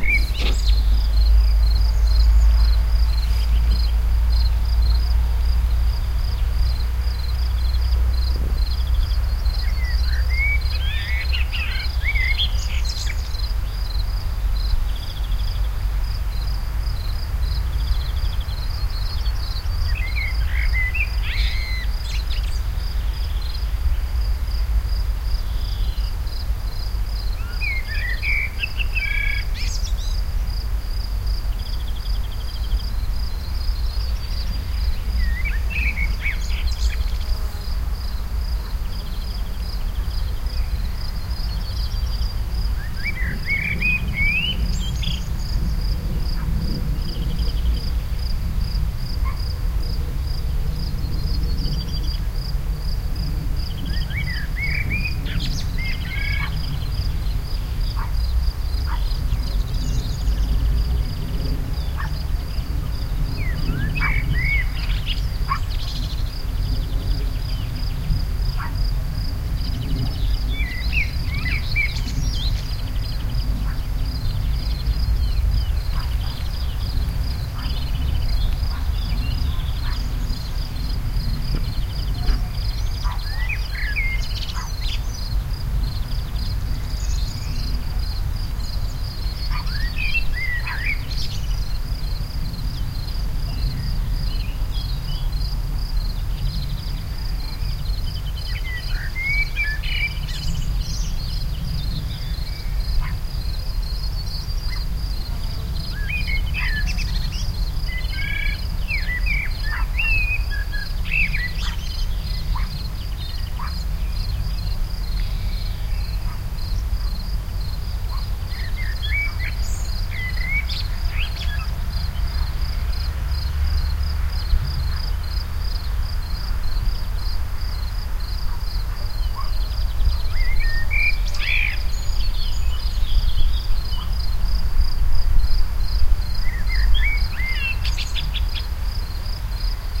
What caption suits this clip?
2019-05-20 crickets bird song1
birds, field-recording, birdsong